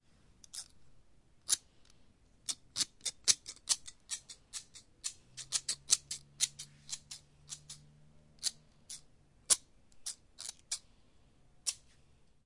Scissors2 Crimped home Jan2012
In this sound I am opening and closing a pair of crimped craft scissors wildly move near and far as well as to the left and right of the zoomH2.
field-recording, scissors, crafts, office-supplies, dare-9, crimped-scissors